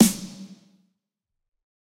Snare Of God Wet 018
realistic, set, drum, snare, kit, drumset, pack